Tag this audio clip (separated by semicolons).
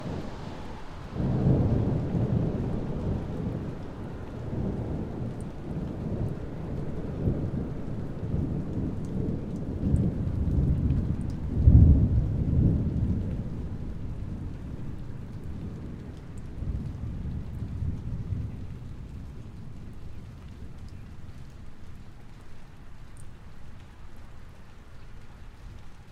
Lightening; Storm; Rain; Thunder